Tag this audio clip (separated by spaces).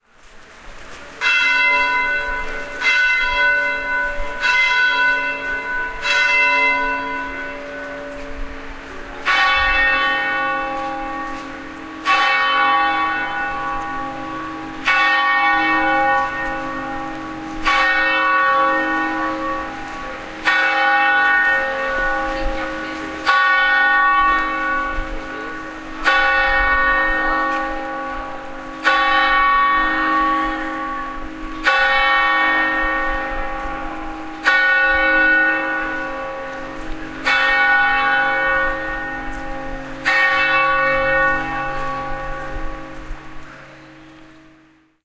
ring bells church twelve